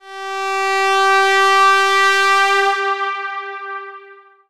S027 Airhorn Low Deep Sound Mono
Sound from an airhorn, low and deep sounding
Airhorn, Long-blast